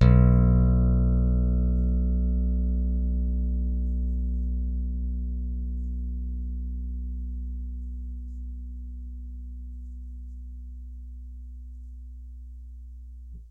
TUNE electric bass
note, pcm